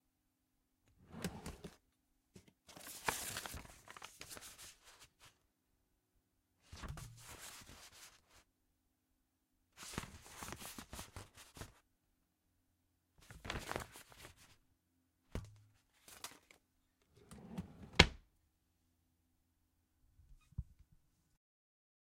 FX Drawer Open Rustling 01
Drawer opened and rifled around in.
close, drawer, fx, open, rifling, rustling